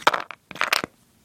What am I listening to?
wood impact 15
A series of sounds made by dropping small pieces of wood.